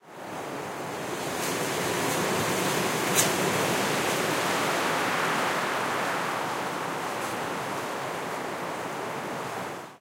a wind gust hits a tree's branches. Or rather I should say leaves, as the tree was a palm. Sennheiser MKH60 + MKH30 (with Rycote windjammer)into Shure FP24 preamp, Edirol R09 recorder
nature, tree, field-recording, storm, wind, gust